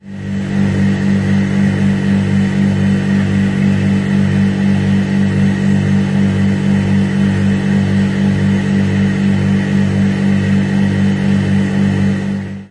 Drone from the inside of my fridge. Recorded onto HI-MD with an AT822 mic and processed.